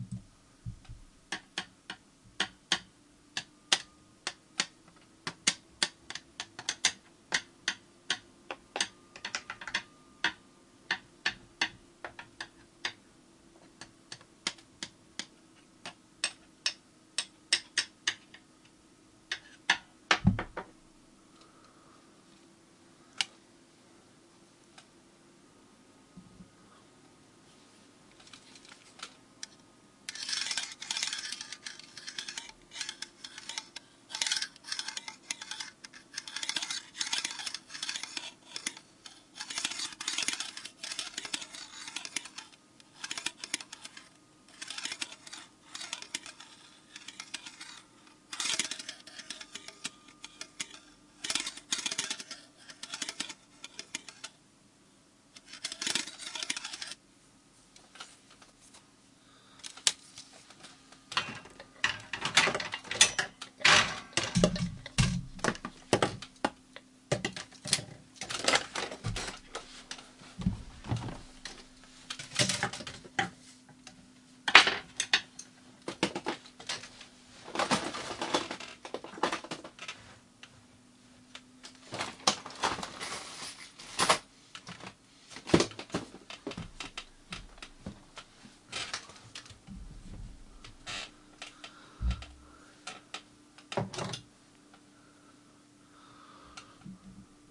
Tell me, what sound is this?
Tapping rattling and scratching
This sound is a collection of noises intended to respresent: a blind person's cane, someone trying to crack a safe and a person searching for something - such as in a store room. It was recorded using a Shure SM58 and a Marantz digital hard drive recorder.